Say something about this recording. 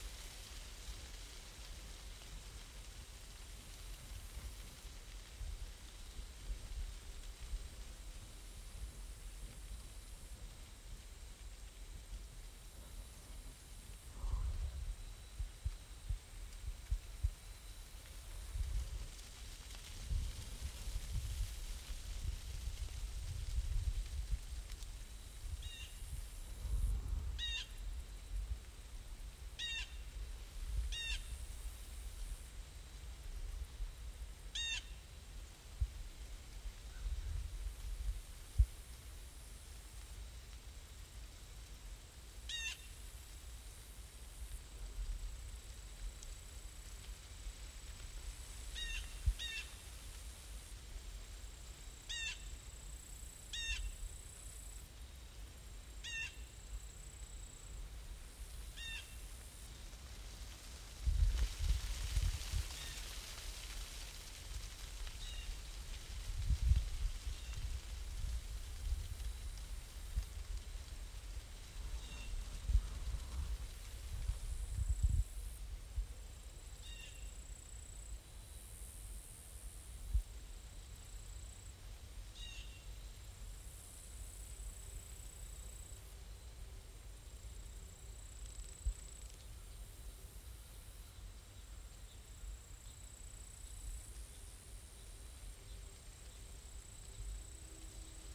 Another recording of one of my favorite fall soundscapes featuring cottonwood leaves "shimmering", the lonely call of a Blue Jay and the soft sound of insects singing a little more quiet than they do in the warmer temps of summer.
Recorded using my Zoom H4-N recorder, and the famous SM57 microphone.